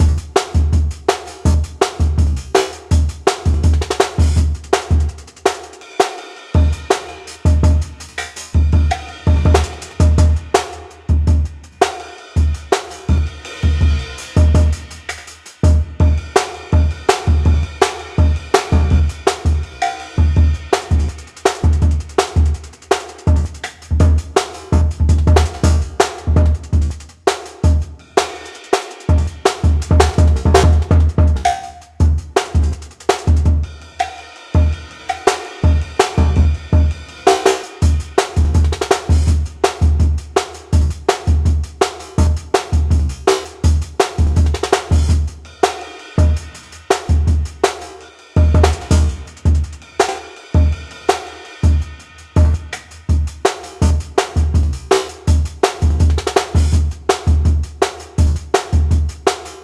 wax on wax off
165 bpm
fl studio and addictive drums vsti
beat, drum, bass, quantized, drums, jungle, breakbeat, drum-loop, groovy